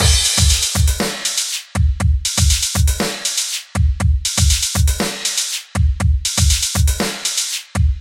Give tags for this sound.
120 120bpm Maschine

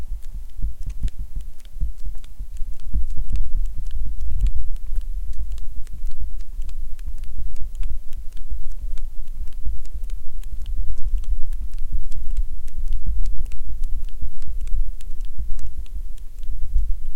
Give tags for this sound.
flip-flops sandals walking

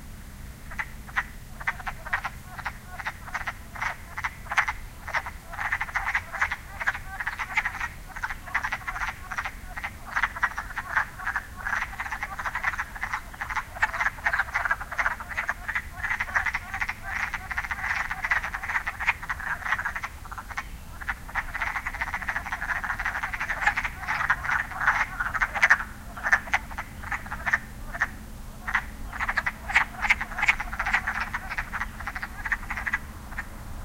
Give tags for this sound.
frogs field-recording binaural

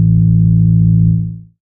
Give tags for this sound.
low sample loop rock metal bass

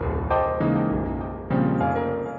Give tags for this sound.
bit crushed digital dirty synth

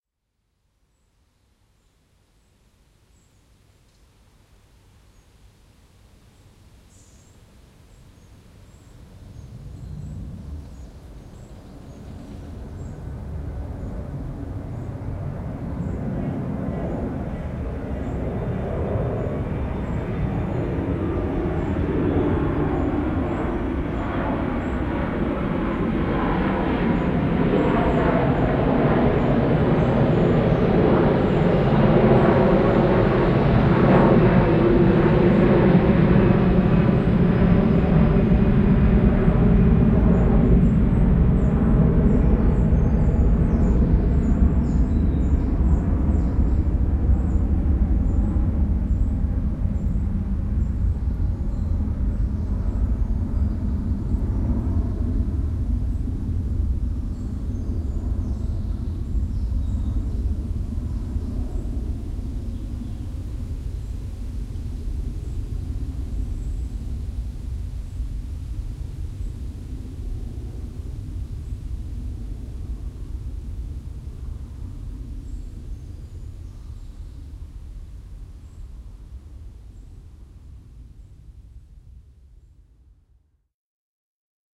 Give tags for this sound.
airliner jet aviation

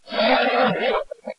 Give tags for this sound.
monster scream scary creepy pain

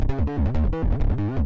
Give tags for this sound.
processed o e t y small pink love deathcore k glitchbreak l thumb h fuzzy

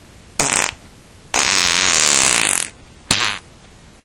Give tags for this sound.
fart flatulation flatulence gas poot

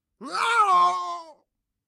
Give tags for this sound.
screaming sounddesign sound-design cartoony vocal strange man gamesound short scream effects shock foley shout sfx

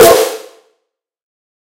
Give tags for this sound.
Industrial Snare Crossbreed Hardcore

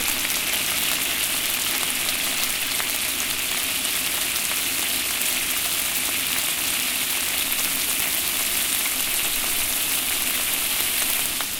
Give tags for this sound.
cooking kitchen

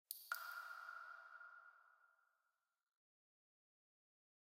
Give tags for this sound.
echoing dark echo cave processed drop reverb water mysterious droplet

